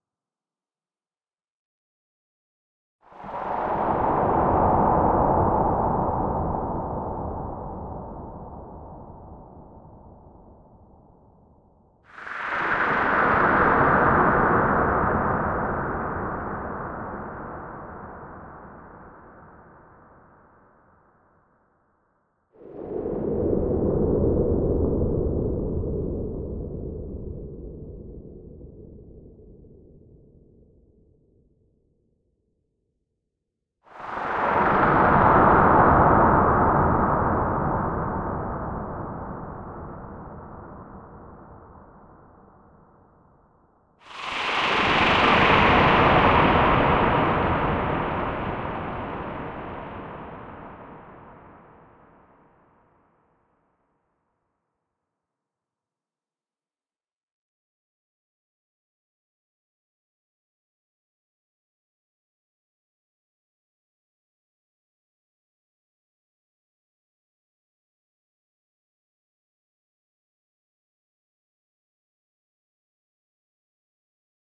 slow ghosts
Experimental synth sound constructed with Omnisphere and Echoboy.